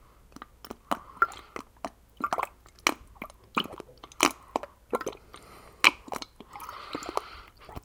slurpy sounds
floop, gush, slurp, water